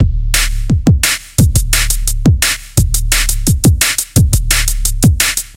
big bass (drum)!
made with a Roland MC-303 (this is not a factory pattern!)
jungle, loop, breakbeat